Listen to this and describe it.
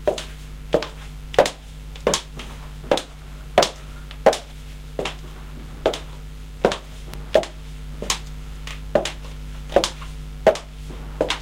My sister walking on a hard surface in her high heels. recorded onto my Sony Walkman MD recorder (MZ N710) with a Sony microphone (ECM-DS7OP)